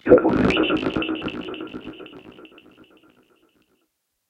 raspy vocal texture that fades out as a slow square lfo modulates the filter width. elektron sfx60's VO VO-6 machine. this sound is soloed from 060102yohaYohLoop128steps117bpmMulch